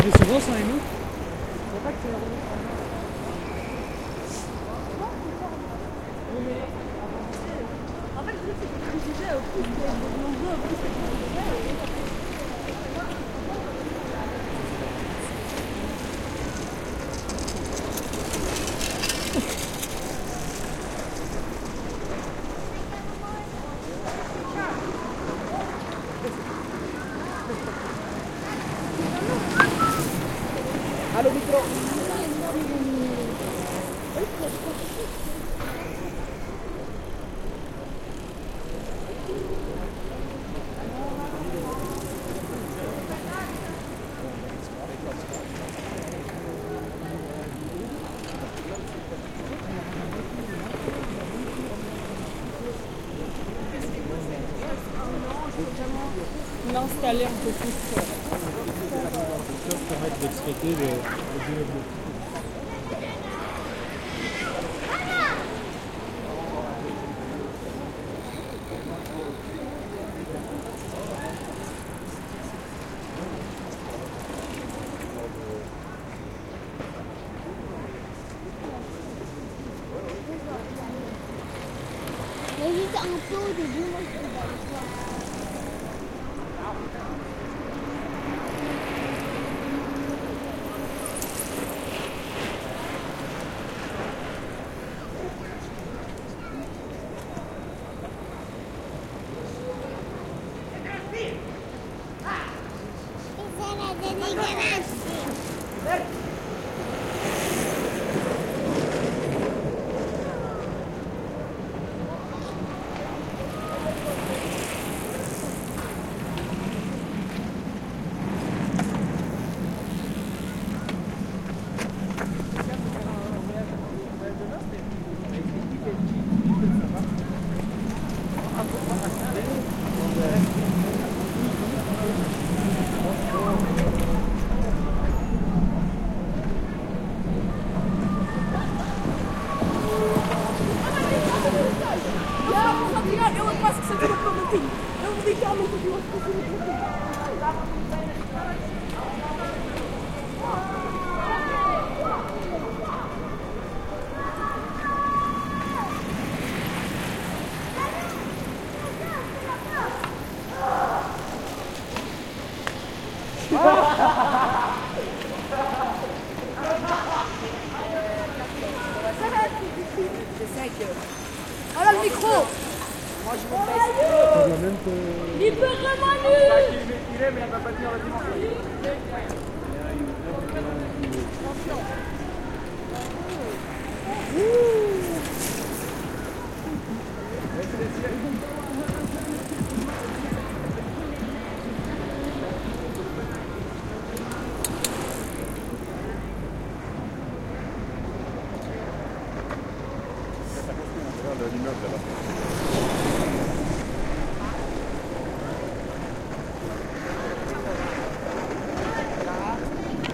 Recorded with LS11, car free day in Brussels. Stereo recording of people passing by on bikes.
Bicycle, voices, wheels